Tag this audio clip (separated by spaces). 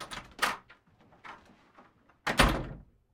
close door doorknob hollow knob light open shut turn wood wood-door wooden